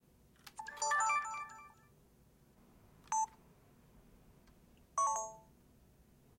Camcorder Beeps
Foley recording of a Sony Camcorder's start-up and recording tones / Sony PXW-X70, or any Sony Hard-Drive camcorder, Post-2009
sfx, video-camera, tone, recorder, startup, camcorder, foley, sony, bootup, electric, sound